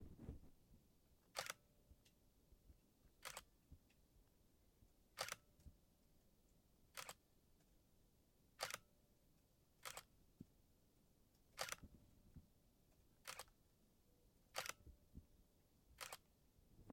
Recorded with Zoom H6 portable Recorder and native Shotgun Mic.